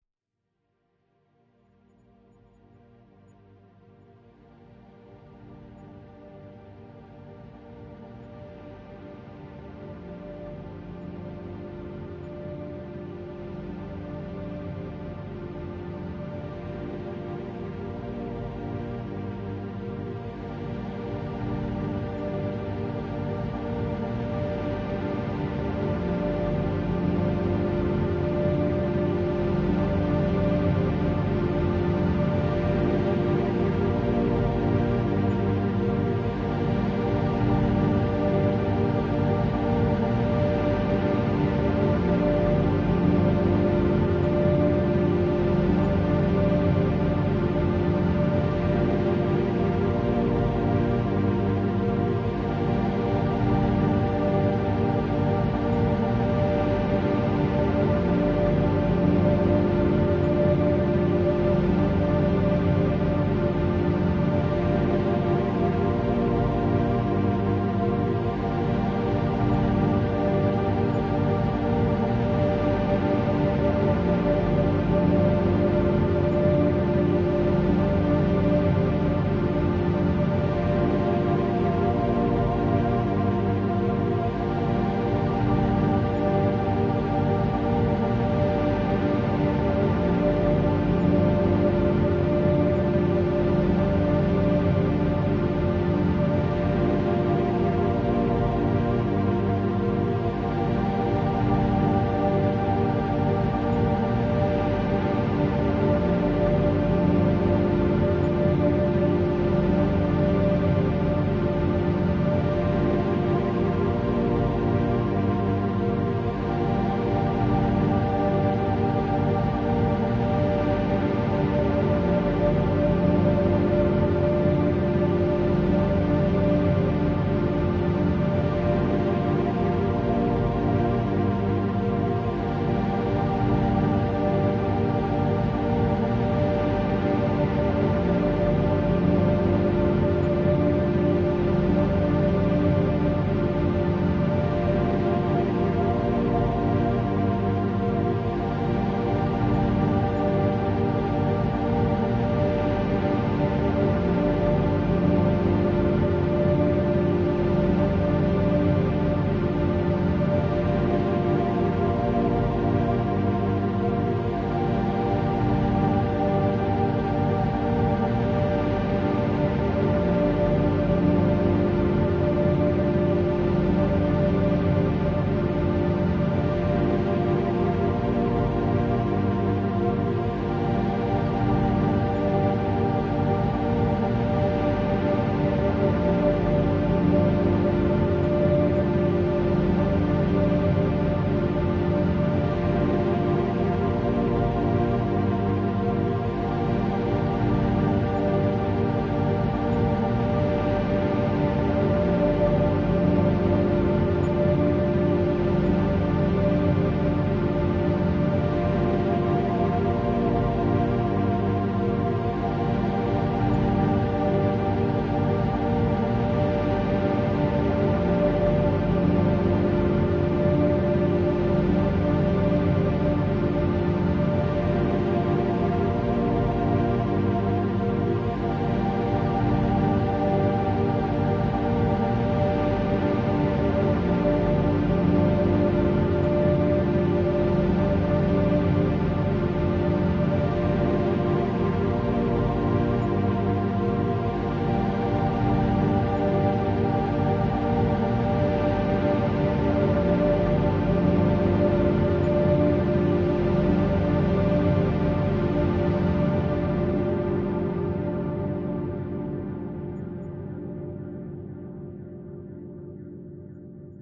CWT LT ambient 9 approaching
ambience, ambient, atmosphere, cosmos, dark, deep, drone, epic, fx, melancholic, pad, science-fiction, sci-fi, sfx, soundscape, space